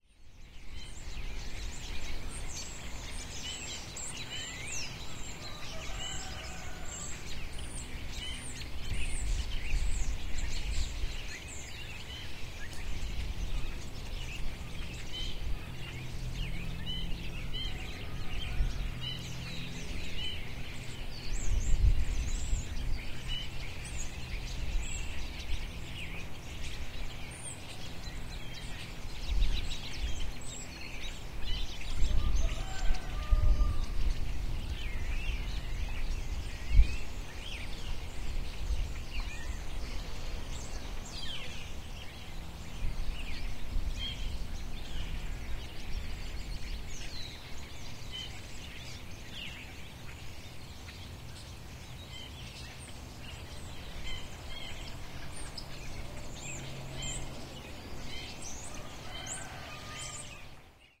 birds + helicopter 02
The sound of birds, with a helicopter going by overhead.